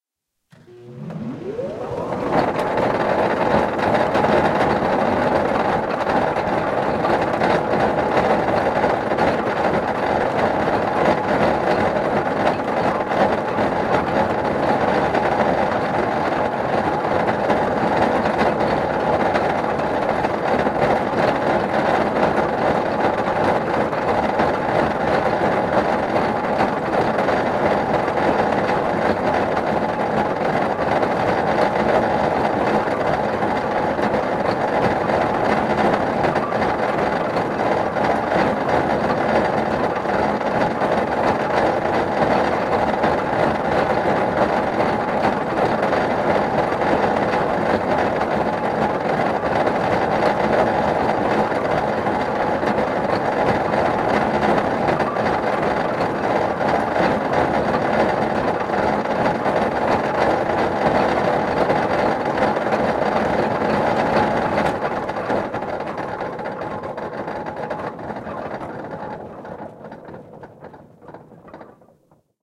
A dual mono recording of a vintage train-wheel lathe starting up, running and stopping, not actually cutting metal. Recorded in a narrow-gauge railway workshop. Rode NTG-2 > Shure FP 24/SD Mix Pre > Sony PCM M10
cogs, gears, heavy-machinery, industrial, machinery, train, wheel-lathe